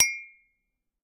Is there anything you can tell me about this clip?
clinging empty glasses to each other